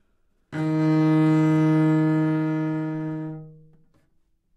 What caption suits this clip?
Part of the Good-sounds dataset of monophonic instrumental sounds.
instrument::cello
note::Dsharp
octave::3
midi note::39
good-sounds-id::2019
Intentionally played as an example of bad-dynamics-decrescendo